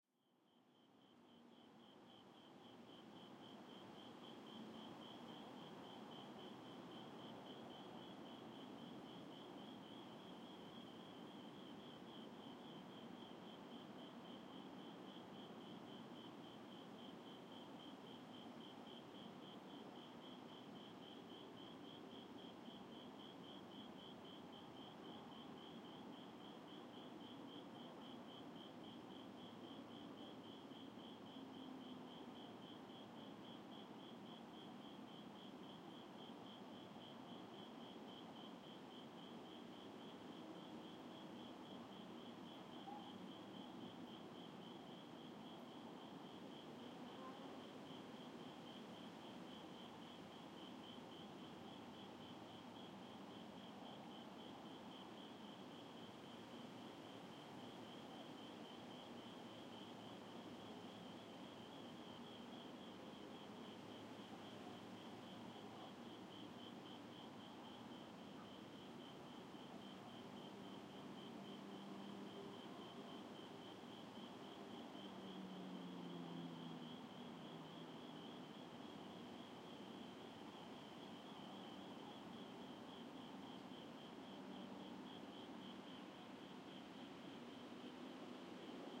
AMB City Night
Recording of a neighborhood summer night in Texas. Includes crickets and distant traffic and light wind. Recorded with Zoom H4n.